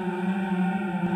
mysty environment sound effect
ambient, effect, environment, mysty, sound